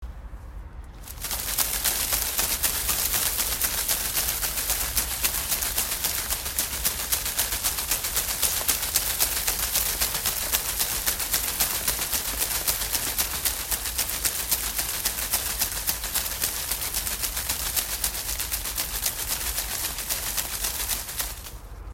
Shaking Tree Branch
field-recording, nature, winter